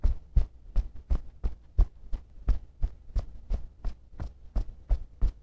footsteps on a stone/concrete floor. It can also be looped.